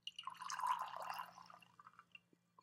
pour water3
Pouring water in a small glass
water, drip